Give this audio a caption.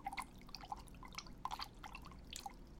35-Liquido Moviendose
Liquid movement noise